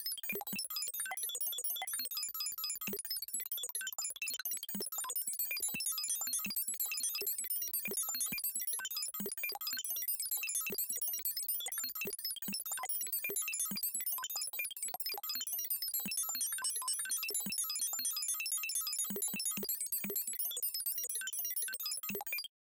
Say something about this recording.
bleep bloops
a series of relatively high-pitched bleeps and bloops in a semi-rhythmic pattern.
a sine synth, sampled 'n' sliced 'n' sequenced.